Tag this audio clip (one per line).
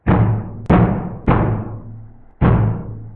drum
doumbek
audacity
percussion